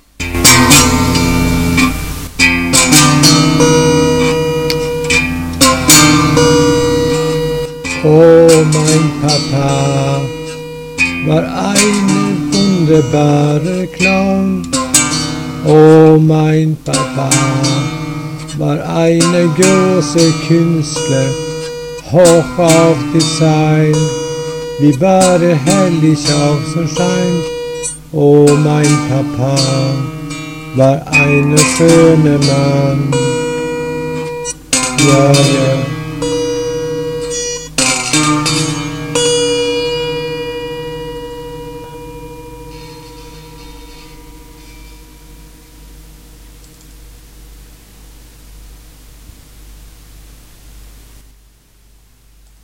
Well known song containing both tragedy and joyce, which later is accented by the only string that is tuned.